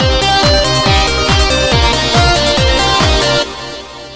Dance Pt. 6
all steps together :) oscillators kick 01 used.
beat, drumloop, progression, techno, trance